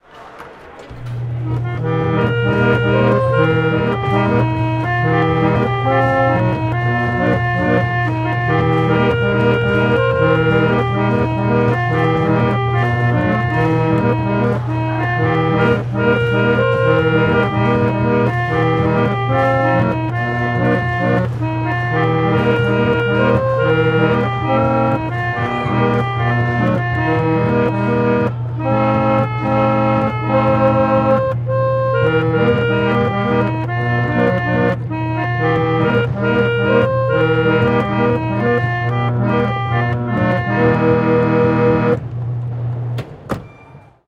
MM Project - 7 Oh Susanna
Musée Mécanique recording project - 7 Oh Susanna
"Early Hand Organ
One of the first music boxes and still in prefect operating condition. Electrified that you may enjoy hearing this very old instrument"
accordion, arcade, box, coin-operated, field-recording, Fishermans, game, hand, harmonica, machine, mechanical, Musee-Mecanique, museum, music, music-box, Oh, Oh-Susanna, old, old-time, organ, play, San-Francisco, song, squeeze, street-musician, Susanna, vintage, Wharf